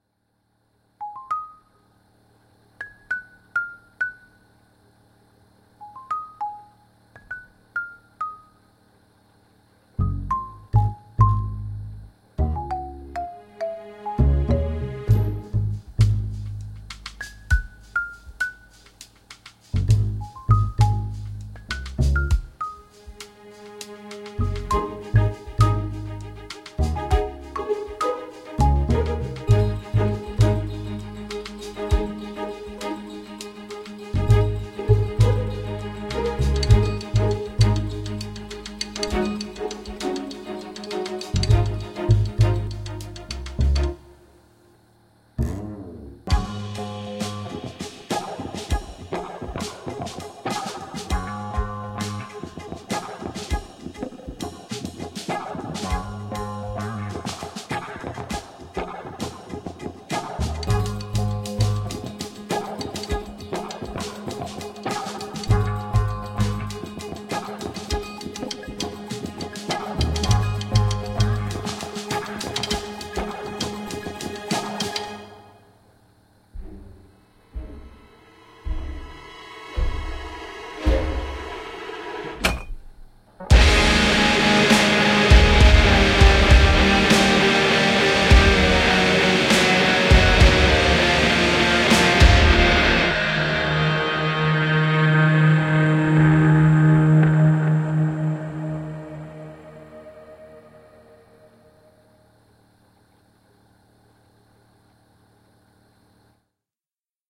Calm background Music
Background music for a calm section in a game or movie